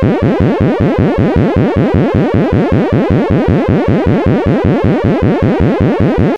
eventsounds3 - PAC!4
intros, sfx, event, blip, desktop, bootup, effect, click, game, startup, intro, bleep, sound, clicks, application